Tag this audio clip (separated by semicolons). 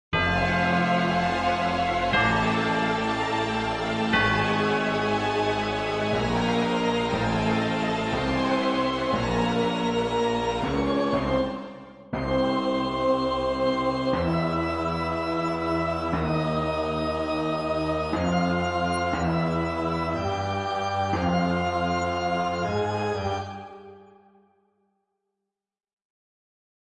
boss; game; dark; videogame